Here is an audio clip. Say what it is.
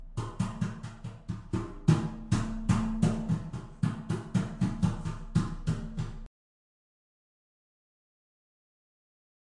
golpe fuerte de pie en una escalon de metal
golpes de pie en un escalon de metal